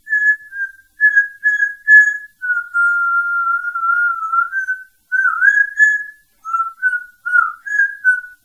African Grey singing a melody
african, grey, melody